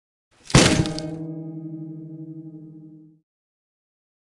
Stereo sample of an acoustic guitar being smashed over a wall. It's a compilation of several files that I found here.